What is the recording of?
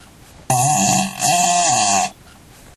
dual toilet fart
fart, noise, flatulence, weird, gas, aliens, laser, poot, explosion, flatulation